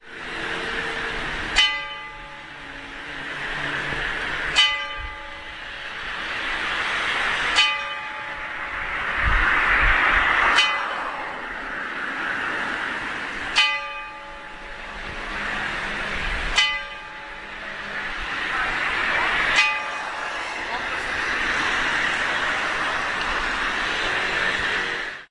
01.07.2010: 18.00. on the Cieplicka street in Sobieszow(Jelenia Gora district/Low Silesia in south-west Poland). the church clock is striking the 18.00.
more on: